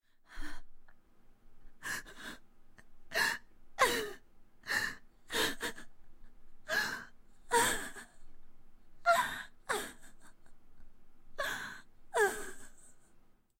Female sobbing
me crying (fake crying of course!) thanks
girl, cry, crying, woman, talk, voice, sobbing, sad, female